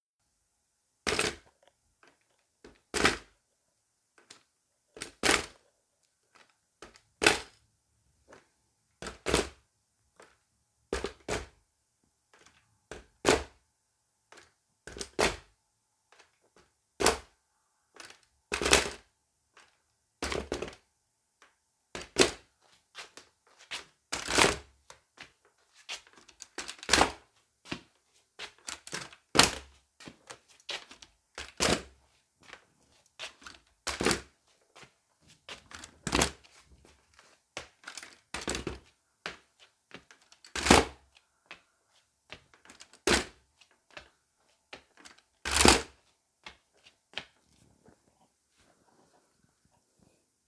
Zimmer Walker Frame on wooden floor
Needed a zimmer frame / walker sound for a radio play, so used a tripod!
Recorded with a Rode lapel mic.
First half has just the zimmer, 2nd half has slippered footsteps as well
foot, walk